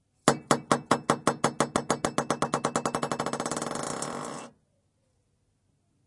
a stick (glass fiber) is released onto the floor
bouncing, floor, rebound